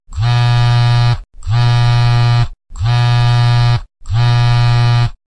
Phone vibration1
call, calling, mobile, phone, telephone, vibrate, vibrating, vibration